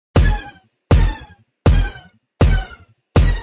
blaster cannon heavy
heavy gun sound for scifi purposes. added different pitches to avoid repetition. inspired from the AT-AT sound
It helps this community a lot :)
gun, scifi, cannon, weapon, blaster, heavy, artillery